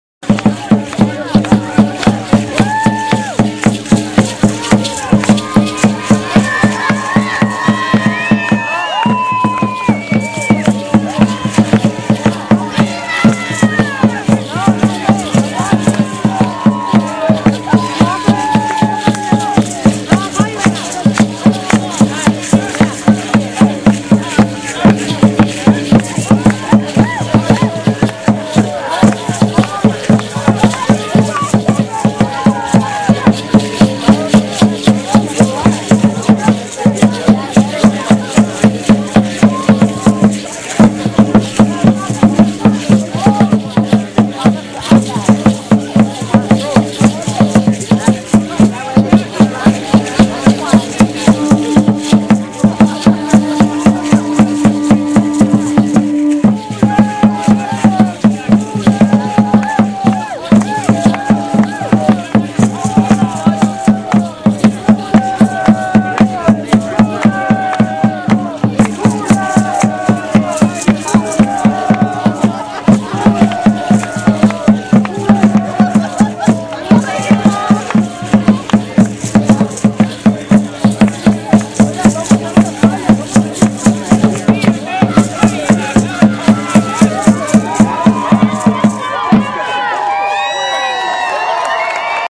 armory park drumming
live drumming recorded @ Armory Park (Tucson AZ) on 5/1/10
drumming, drums, field-recording